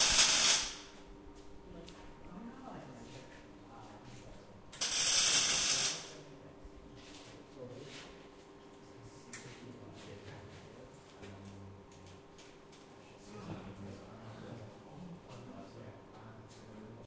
industrial welding short 3x
3 short welds in quick succession
weld, mig-weld, welding, arc-weld, medium